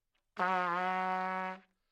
Trumpet - Fsharp3 - bad-richness
Part of the Good-sounds dataset of monophonic instrumental sounds.
instrument::trumpet
note::Fsharp
octave::3
midi note::42
good-sounds-id::2942
Intentionally played as an example of bad-richness